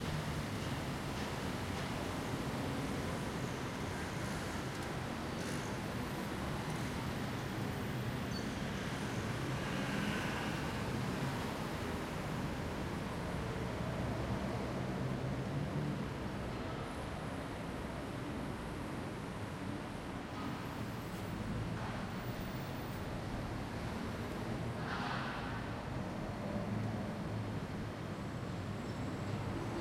Zoom H1 recording of Bangkok streets